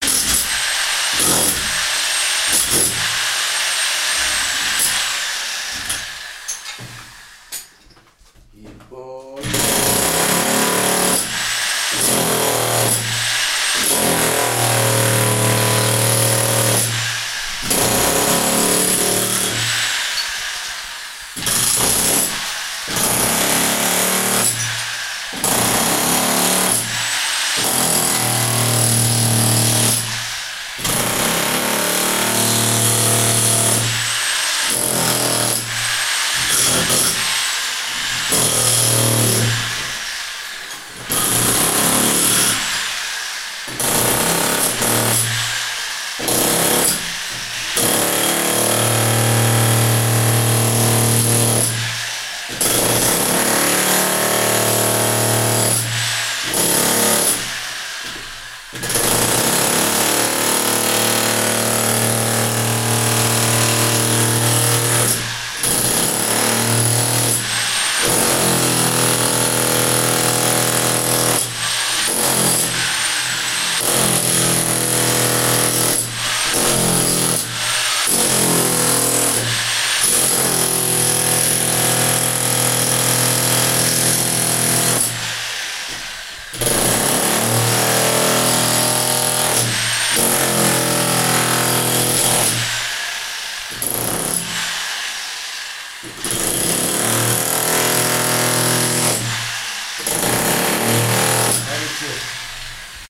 Plumber with jackhammer 3
Plumber with jackhammer breaks through the wall full of tiles
Recorded in the room with zoom H1n
wall, man-at-work, construction, shatter, plumber, Jackhammer, break, demolish, demolition